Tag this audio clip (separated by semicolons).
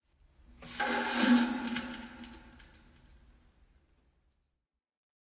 beer; disgusting; fart; human; stink